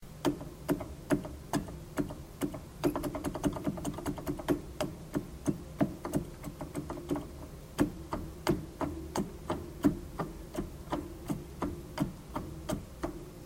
Organ Keyboard Keys, Depressed, A
Raw audio of keys being depressed on a church organ keyboard.
An example of how you might credit is by putting this in the description/credits: